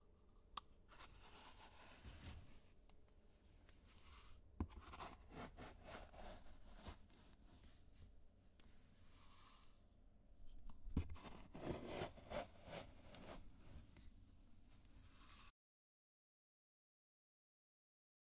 Scooping Powder
Powder being scooped up.
OWI, powder, scoop, scooping